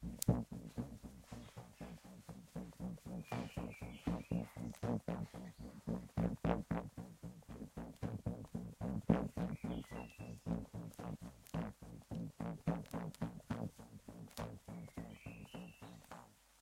Weird sound made with a rubber-band. Unfortunately, some birds sing in the background...